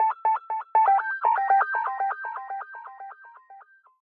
Some keyboard riffs for you!
keyboards, riffs, electronic, instruments, synth